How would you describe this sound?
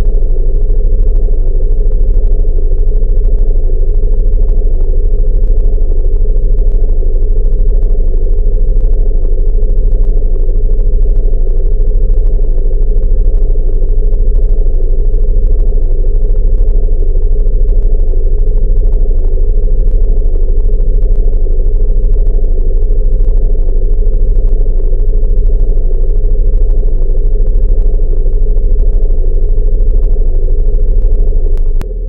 Ominous II
background, cinematic, danger, dark, ominous, powerful, soundscape, suspense, tense